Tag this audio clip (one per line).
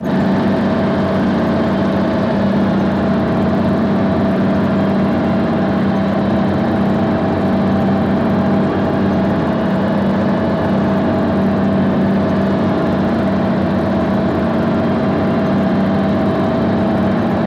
machine
mechanical
motorized
rolling
squeaky
tank